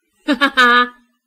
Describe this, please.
heh heh ha
I don't remember why I laughed, but I decided to save it